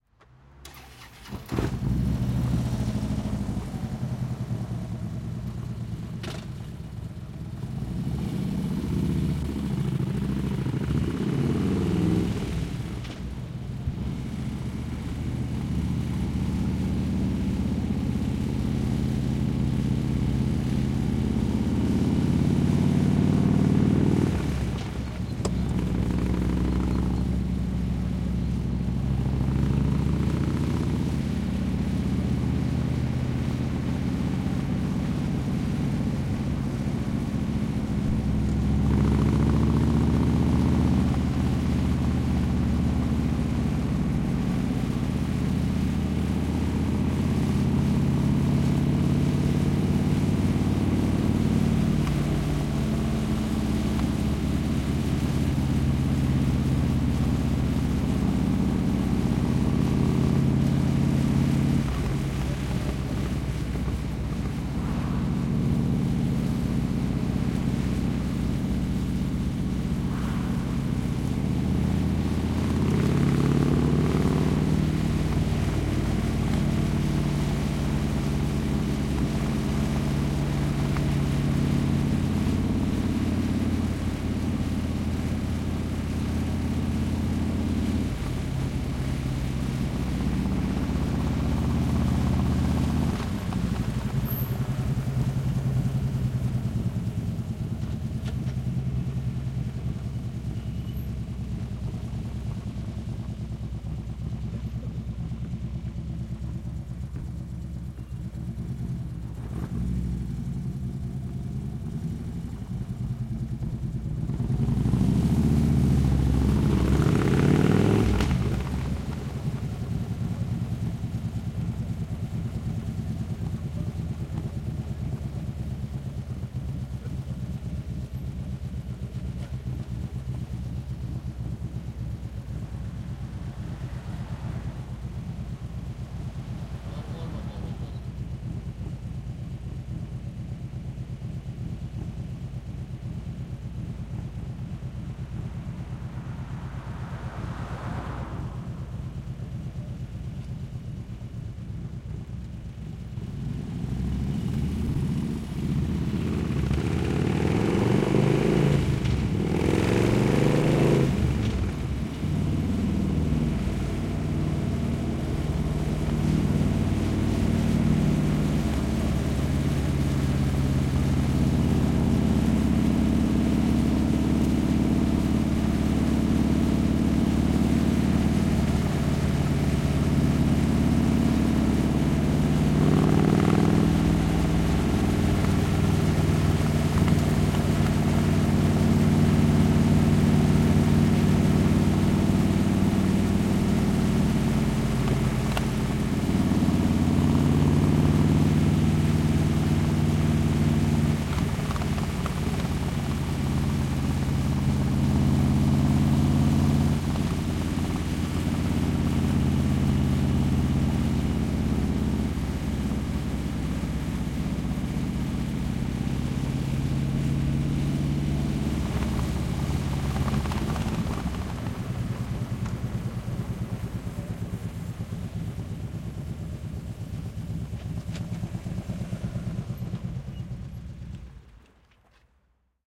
Harley davidson slow ride
Recording of Harley Davidson motorcycle, start-slow drive behind the car-stop-shutdown. Equipment SD633, 1 channel: Sennheiser MKH-416 from leading car trunk towards motorcycle, 2nd channel - Sennheiser SK5212-II MKE-1 lavalier on motorcycle rider, exaust side.
Ride, Motorcycle, HarleyDavidson, Chopper, Driving, engine, Field-Recording